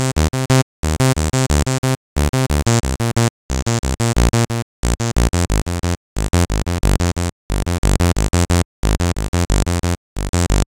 Short-decay saw sequence, with some filter resonance, a less FX version of weirdarp.
arp, extraneous, rancid, strange, synth, weird